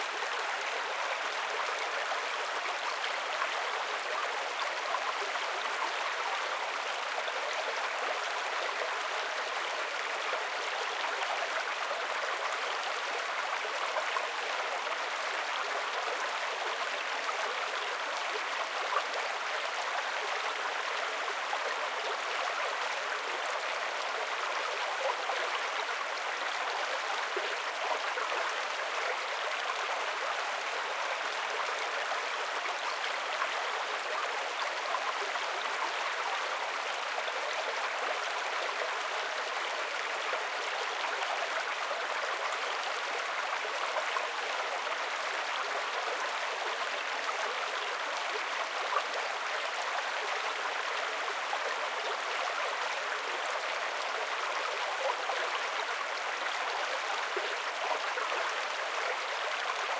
Collection of 3 places of a smaller river, sorted from slow/quiet to fast/loud.
each spot has 3 perspectives: close, semi close, and distant.
recorded with the M/S capsule of a Zoom H6, so it is mono compatible.